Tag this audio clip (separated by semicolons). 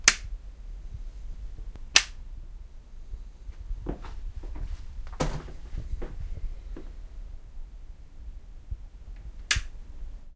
click; room; lights; switch-on; switch; dare-12; lightswitch; light; switching; switch-off